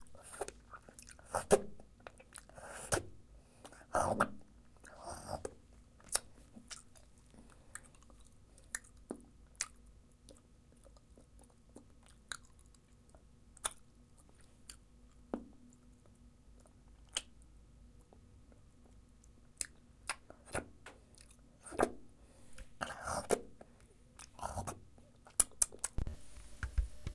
licking drool dog
dog, drool, licking